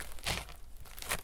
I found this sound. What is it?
SLIDING ON GRAVEL
sliding foot against harsh pavement